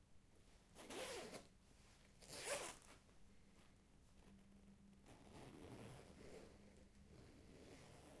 Just a little zipper collection.